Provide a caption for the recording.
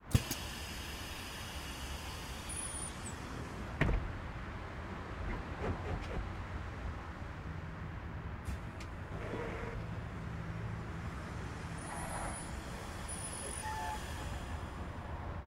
Bus Open Close Door 001
open, bus, door, close